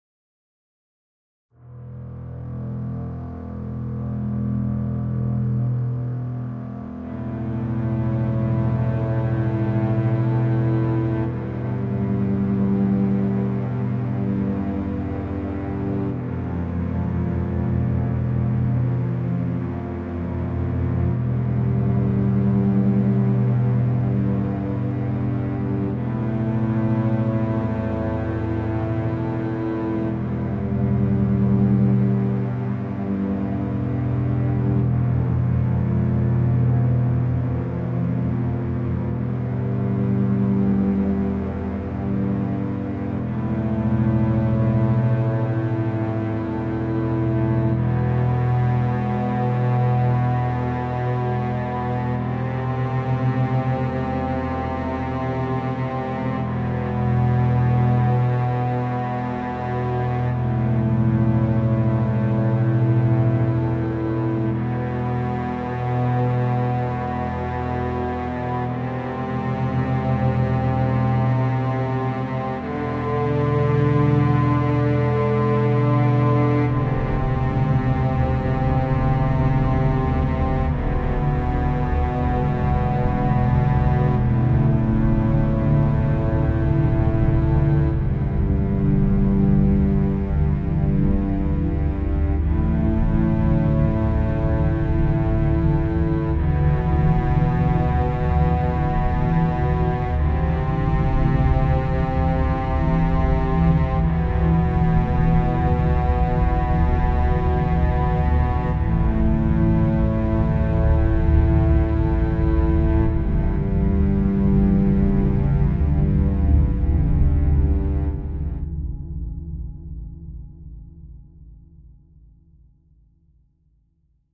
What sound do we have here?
This is sound that is great for someone who is stressed, going through pain, also good for a death scene. Sad is the mood.